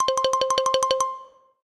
Xylophone for cartoon (11)
Edited in Wavelab.
Editado en Wavelab.
dibujos, xylophone